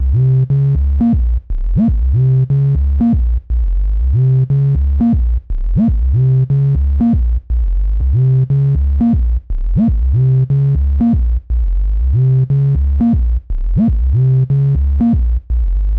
These loops are all with scorpiofunker bass synthesiser and they work well together. They are each 8 bars in length, 120bpm. Some sound a bit retro, almost like a game and some are fat and dirty!
These loops are used in another pack called "thepact" accompanied by a piano, but i thought it would be more useful to people if they wanted the bass only.